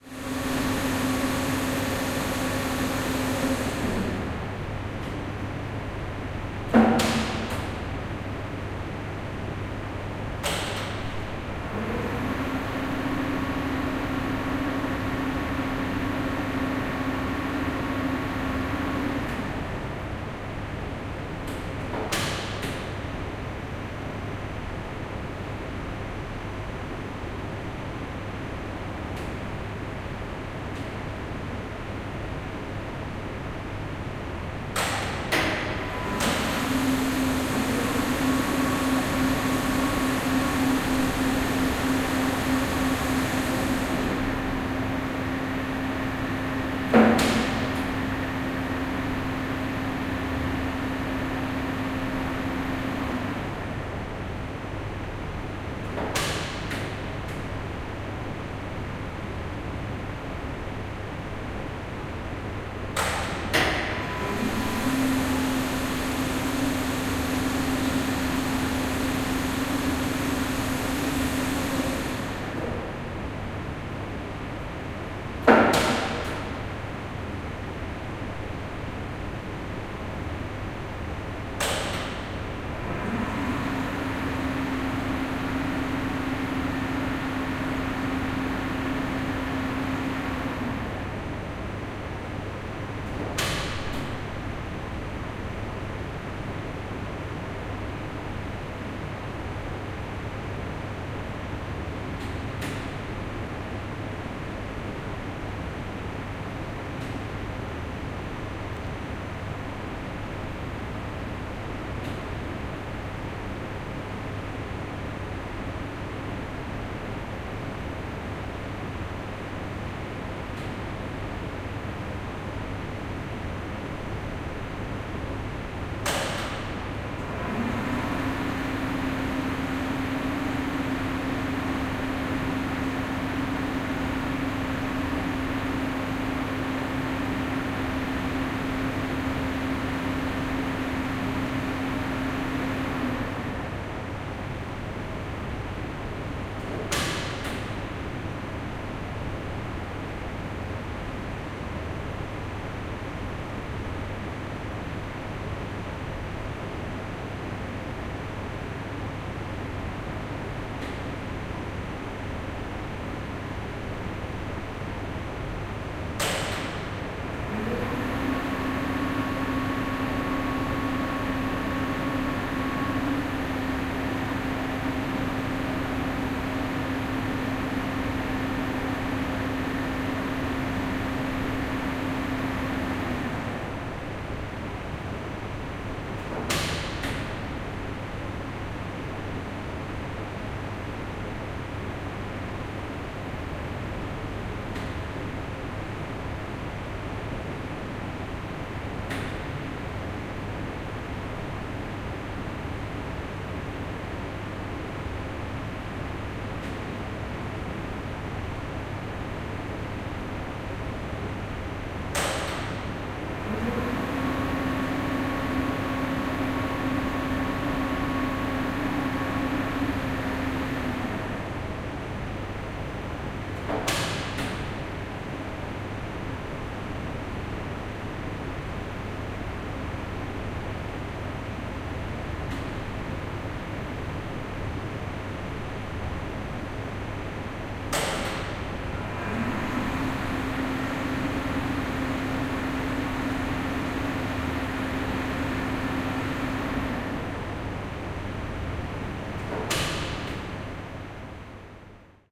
lift relais
I am standing between two motors for two lifts of a medical center. near the relais that control the motors.
Generator, POWER, Operation, MOTOR, COMPRESSOR, machinery, mechanical, machine